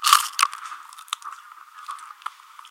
Cruch Filling
this is cruch as filling more records.
crunch, leaf, cruch, bite